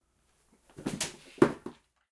Body falling to floor 1
A body falling hard to a wood floor, natural reverberation present.